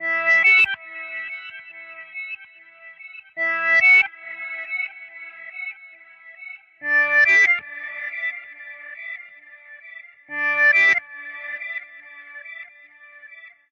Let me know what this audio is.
live reverse melody